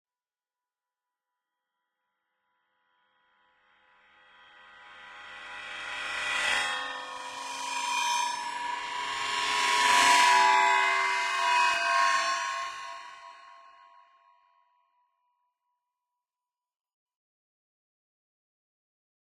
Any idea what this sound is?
Metallic Fragment
A resonant metallic shard generated and edited in Kontakt and Peak.